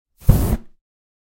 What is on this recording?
fire spray
Homemade flame gun
fire, flame, burst, gun, spray